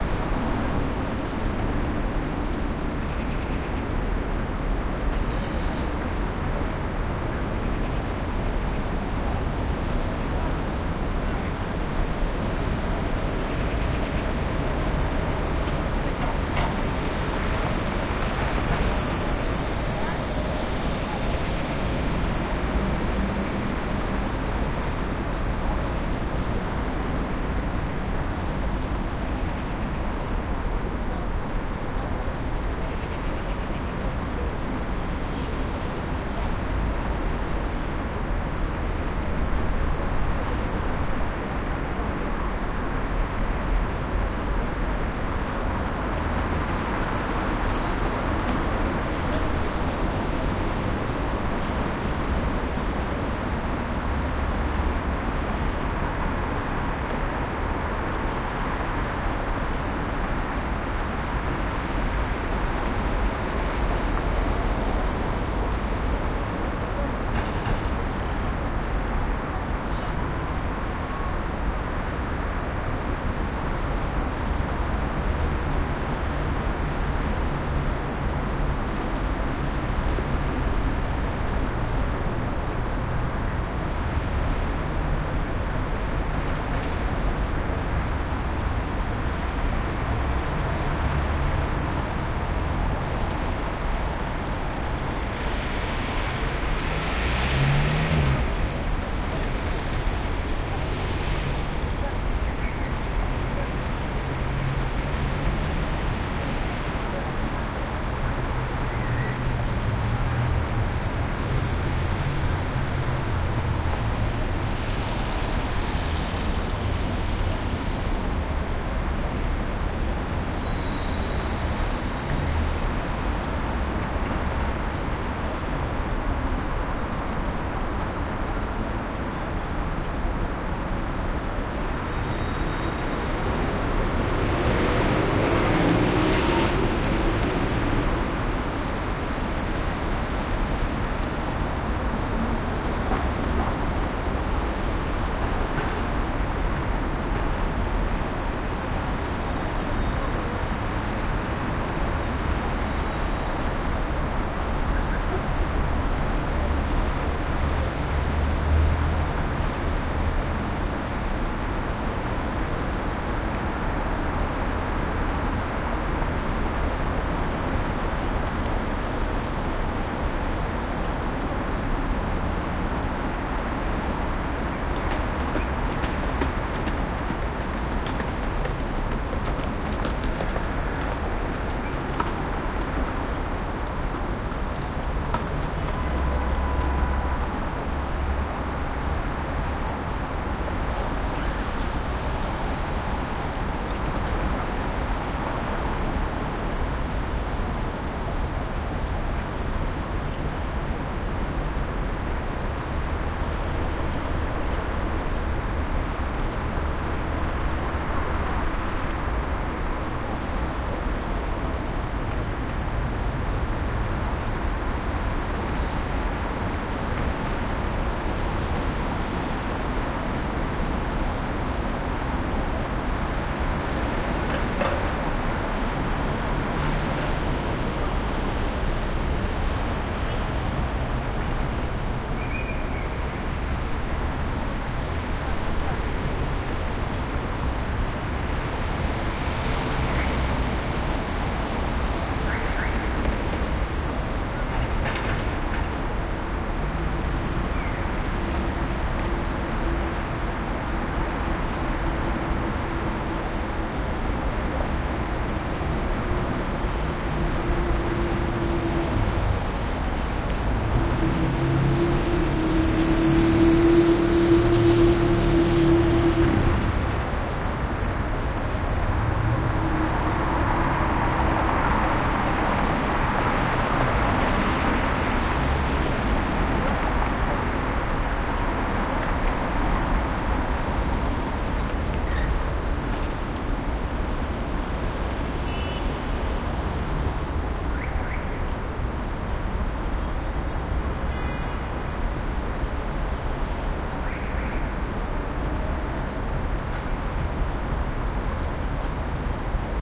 Big City Noise 15072021
This is a real reording sound file from the big city. Please write in the comments where you used this sound. Thanks!
sound, street, soundscape, traffic, big, city, ambience, autos, ambient, noise